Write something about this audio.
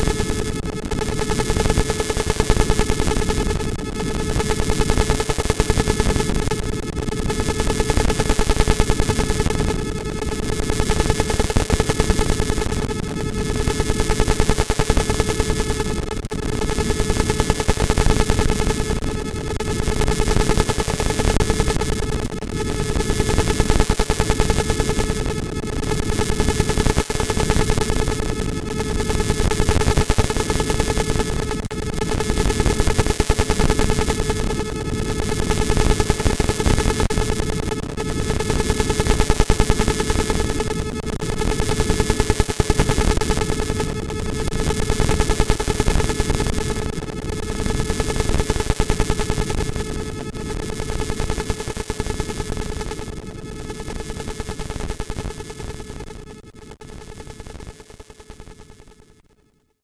negatum-c685de4-s
Genetic programming of sound synthesis building blocks in ScalaCollider, successively applying a parametric stereo expansion.
genetic-programming, scala-collider, synthetic